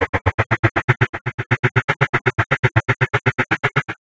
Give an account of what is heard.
Sound like blowing through a tube, bottle effect.
congatronics, samples, tribal